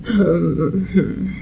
1 triste macho
Sad, vocal, voice